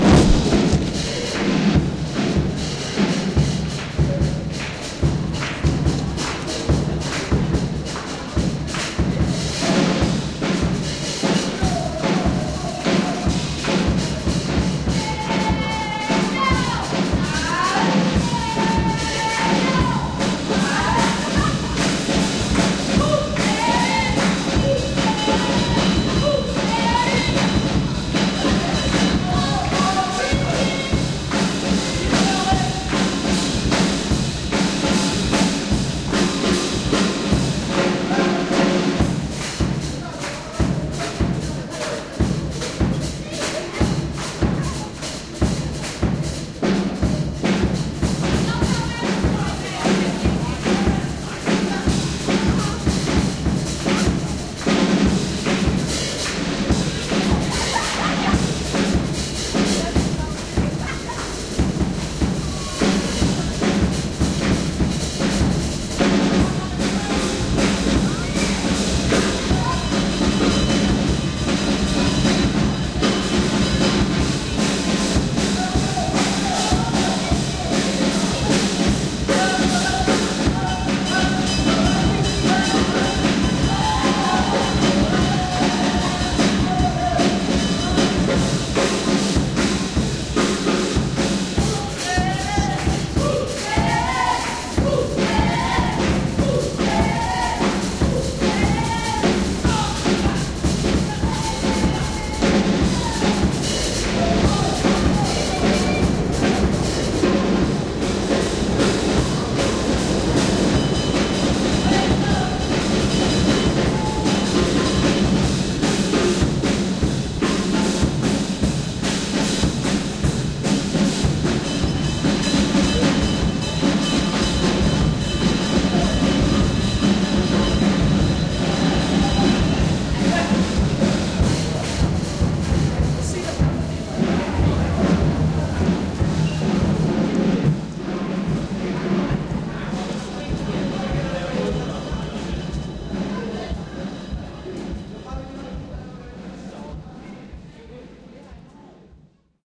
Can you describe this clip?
subwaymusic-NY1994
A group of live performers busking in the NY time square subway station in 94.
Was on my way out when we came across this whole marching band and dance troupe doing their thing, it was huge! After a while we went off looking for the correct exit in the maze of stairs and tunnels of the station.